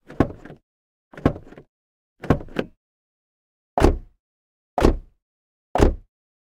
Vehicle Car Peugeot Bipper Door Open Close Mono
Opening (x3) and Closing (x3) Car Door - Peugeot Bipper.
Gear: Rode NTG4+.